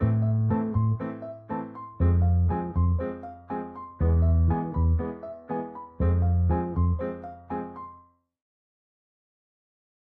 Dark loops 095 simple mix version 7 short loop 60 bpm
This sound can be combined with other sounds in the pack. Otherwise, it is well usable up to 60 bpm.
loops, piano, loop, 60bpm, bass, 60, dark, bpm